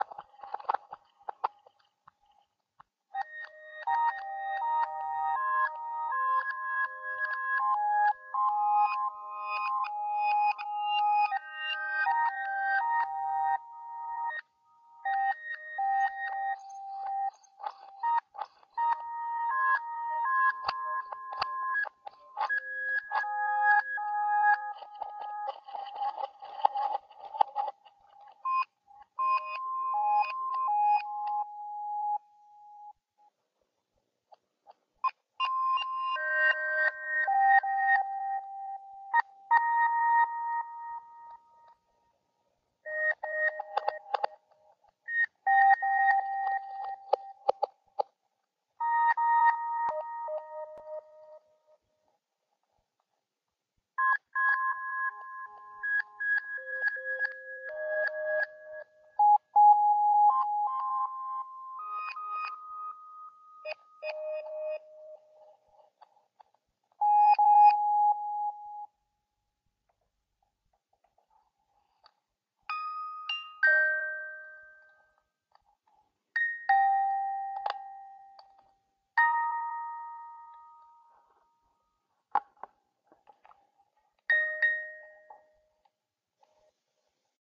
ambient with micro contact
kaoos, contact, ambient, submarine, micro